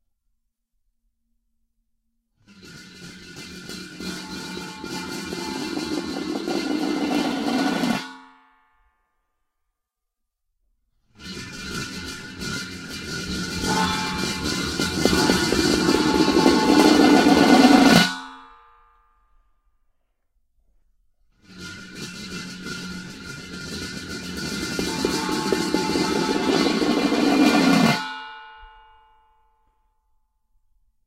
metal mixing bowl spins edit
Three takes of a metal mixing bowl is spun around on a wood floor until it comes to a stop. Recorded with a Sennheiser ME66 microphone and a Marantz PMD660 audio recorder. Minor editing and noise reduction applied.
metal, metallic, mixing-bowl, spin